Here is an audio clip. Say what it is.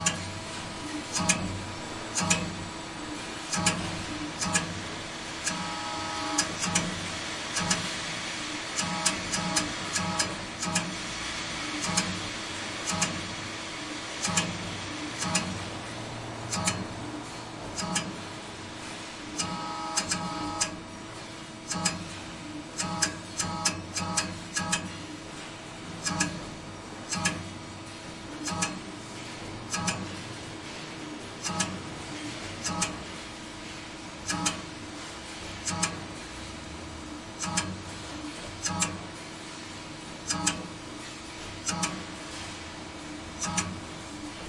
03 - armature rolling stock close
Sound of rolling armature stock, Recorded on reinforced concrete plant. Recorded on Zoom H6